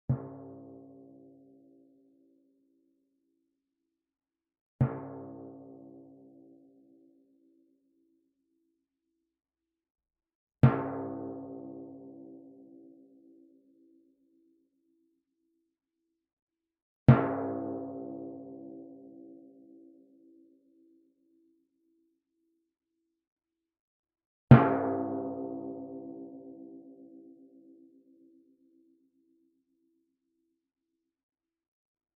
timpano, 64 cm diameter, tuned approximately to C#.
played with a yarn mallet, about 3/4 of the distance from the center to the edge of the drum head (nearer the edge).
drum, drums, flickr, hit, percussion, timpani